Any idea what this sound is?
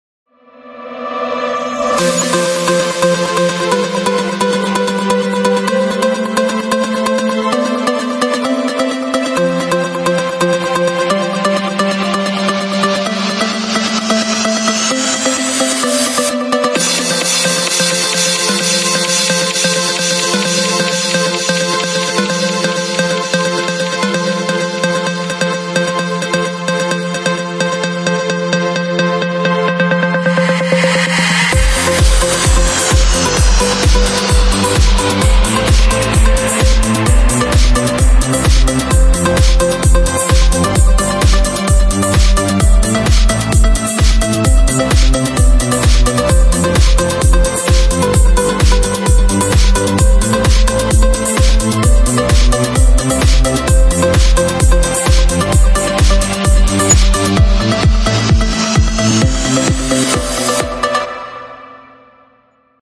Background melody 130bpm
This sound was created with layering and frequency processing.
BPM 130